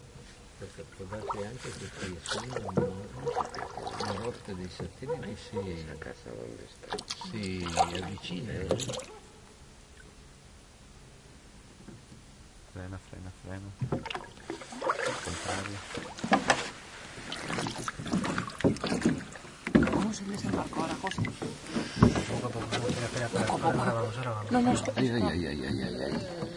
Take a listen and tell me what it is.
20100801.wharf.disembarkment.night
paddling, distant dog barking, quiet talk and noise of a small boat reaching a wharf. Shure WL183 mics into Fel preamp and Olympus LS10. Recorded in a lake near Pappinen, Finland
padling, bang, spanish, field-recording, voice, boat